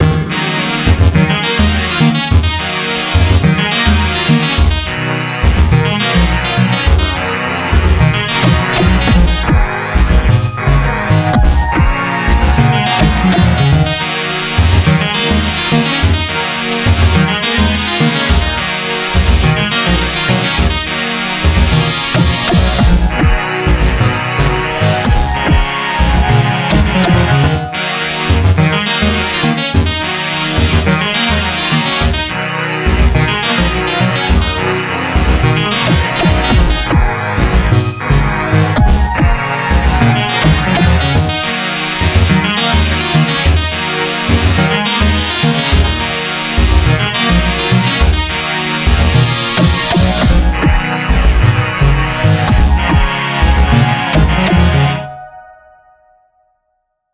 Lost Moons -=- Stop Motion
An odd sounding fluctuation...
astoundtracks, Game, games, o0, or, Pit, Point, realization, whatever